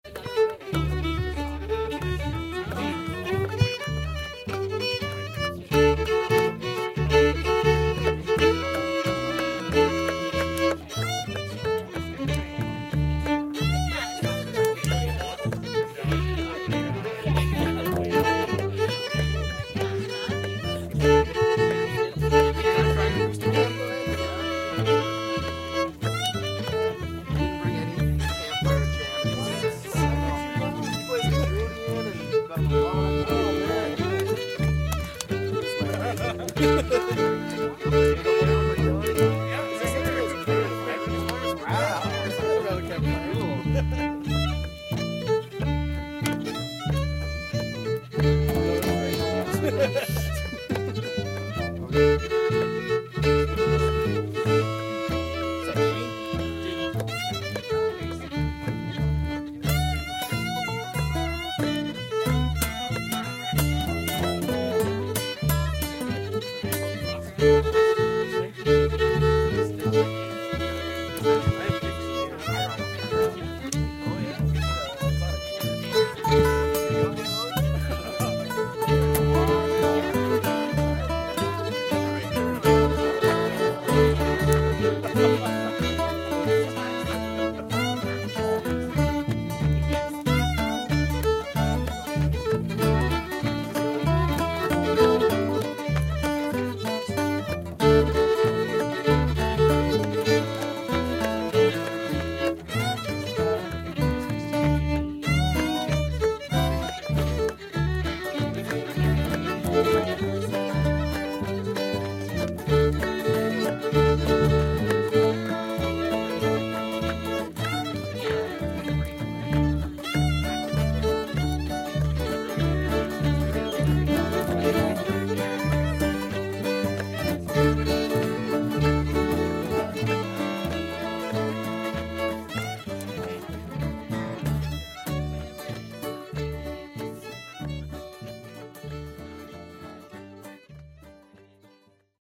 Campfire song
Zoom H2N Field Recording of acoustic performance around campfire in Clearwater, Manitoba.